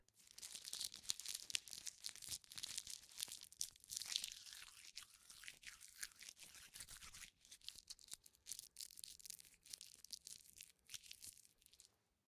Rubbing a wad of tape between my fingers and palms.